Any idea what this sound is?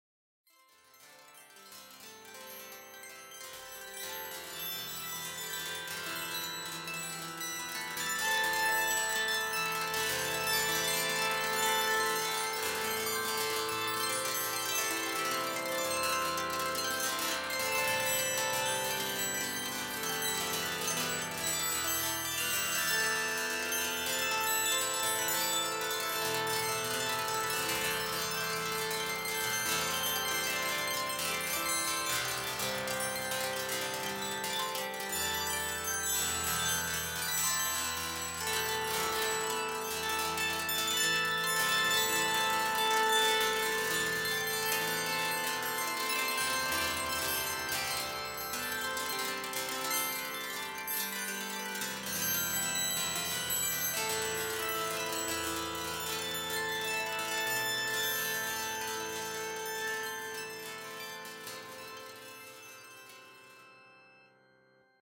Wheel Music
Music composed stochastically using an algorithm which is illustrated here:
As the wheel enters each quadrant, notes are selected from a different chord based on the positions of the dots.